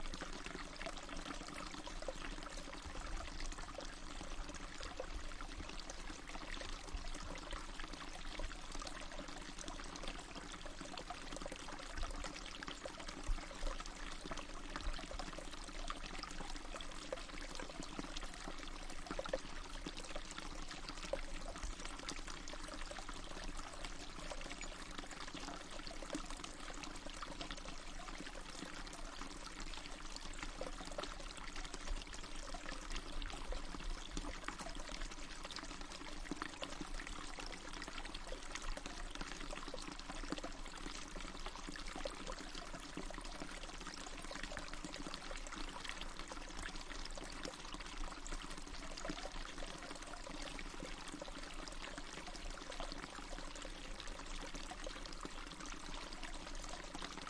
VOC 170330-1240 FR Water
Small water source (close miking).
Recorded in September 2017 in France, with an Olympus LS-100 (internal microphones).
small, flowing, spring-water, water-stream, close-miking, water-source